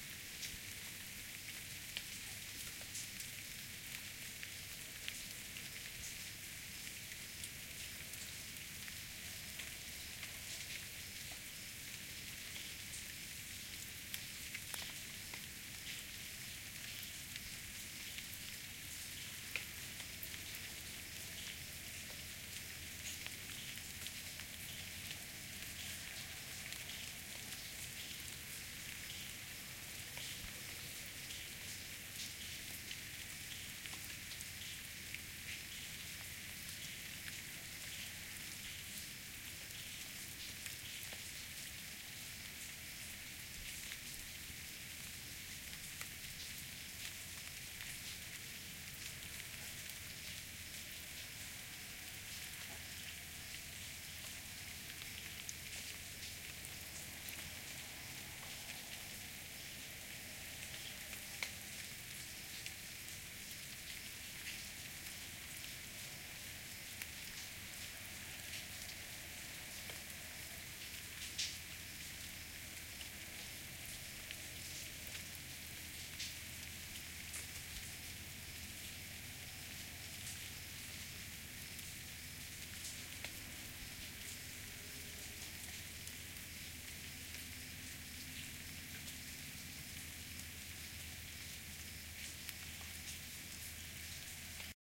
Light drizzle with crickets uncompressed
this is part of a series of rain and thunder sounds recorded at my house in johannesburg south africa, using a zoom h6 with a cross pair attachment, we have had crazy amounts of rain storms lately so i recorded them with intent of uploading them here. a slight amount of eq has been applied to each track.
drips, drops, drip, dripping